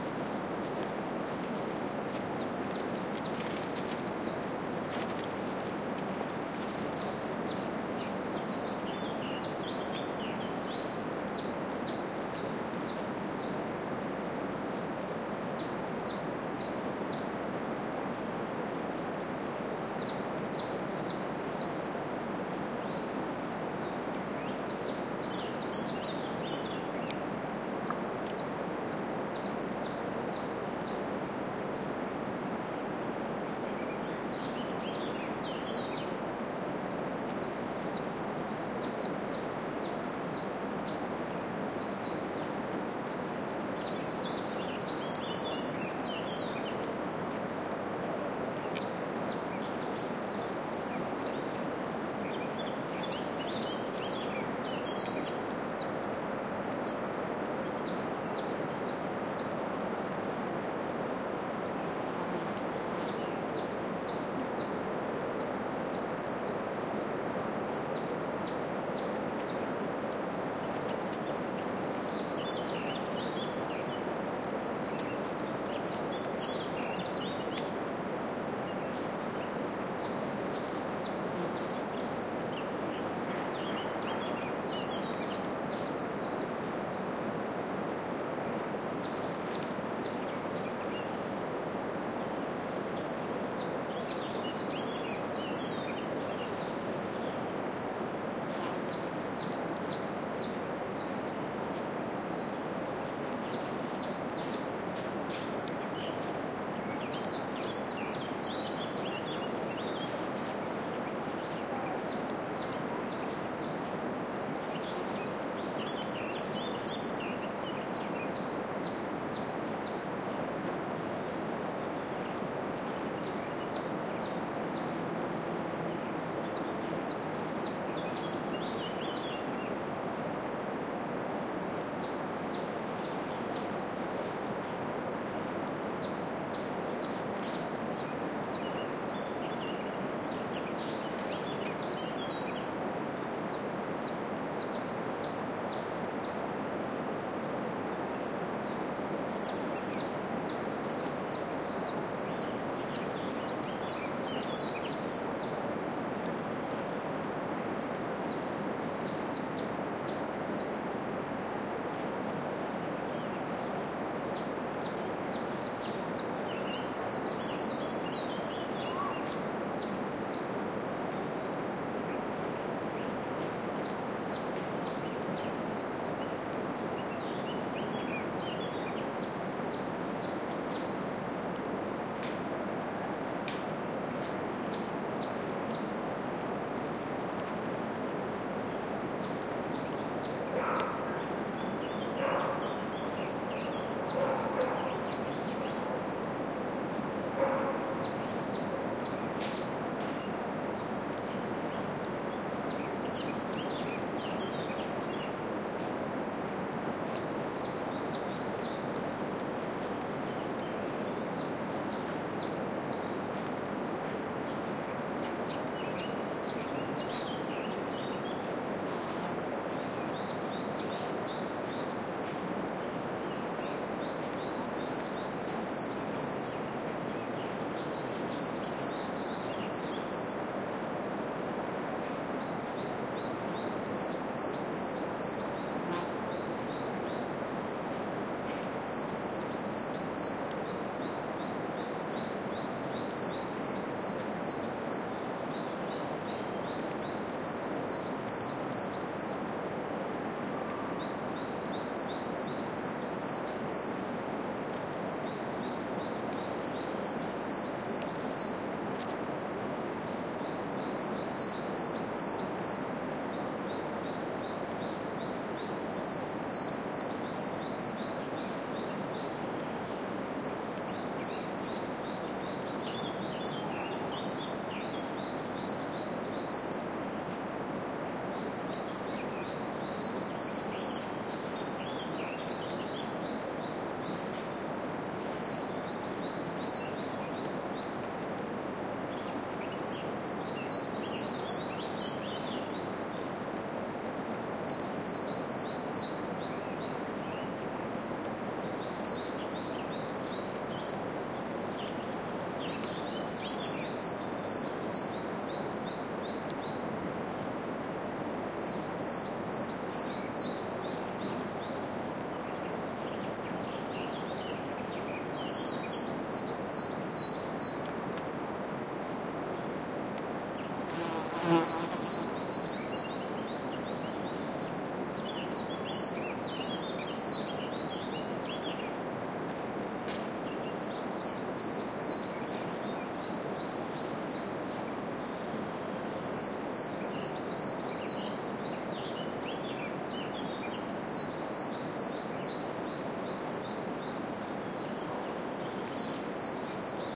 20140418 beech.forest
Beech forest ambiance in early spring, with bird tweets and a distant river. Primo EM172 capsules inside widscreens, FEL Microphone Amplifier BMA2, PCM-M10 recorder. Ordesa National Park, near Torla (Huesca province, N Spain)